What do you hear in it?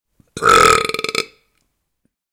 Sound of burp. Sound recorded with a ZOOM H4N Pro. Sound recorded with a ZOOM H4N Pro and a Rycote Mini Wind Screen.
Son d'un rot. Son enregistré avec un ZOOM H4N Pro et une bonnette Rycote Mini Wind Screen.
blurp, stomach, hungry, burping, bubbles, human, funny, disgusting, burp